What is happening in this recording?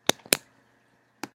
I recorded in and out clicks on a variety of pens. Here are a few.
clicks, pen, click, pop, snap, clicking